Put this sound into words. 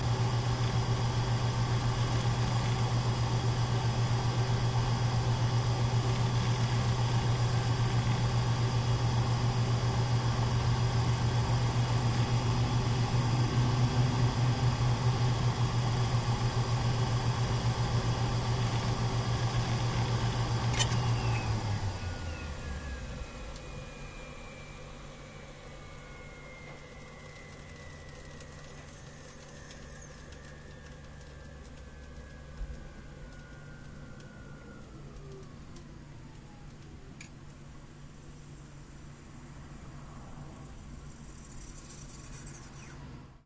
Stop computer after 'shutdown -P now' command was entered.
H-m-m... yes, it's Linux OS.
System have 4 HD (Seagate) and Zalman system fun.
Gagabyte, HD, Intel, Linux, PC, Zalman, computer, hard-drive, operation-system, shutdown, system